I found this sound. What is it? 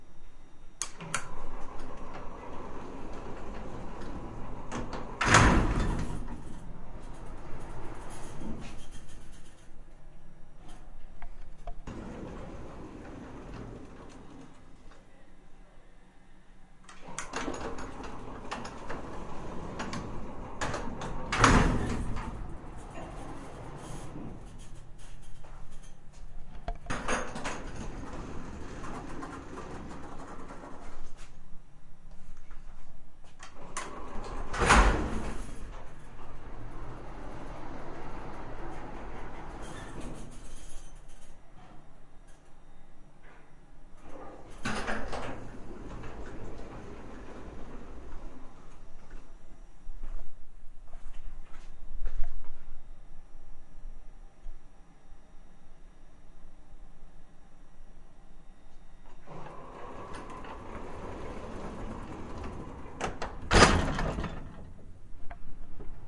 Chris' Elevator
This is the very old elevator in my friends apartment building.
building-noise, atmosphere, sound-effect